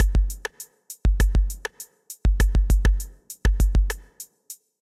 100bpm 2 measures 5
A 100 BPM, 2 measure electronic drum beat done with the Native Instruments Battery plugin
drumbeat, BPM, electronic, 100